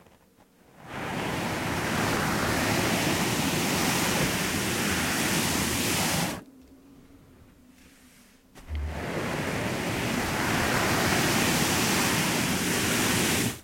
Dragging On Carpet
Dragging object on Carpet
Drag, Rug, Carpet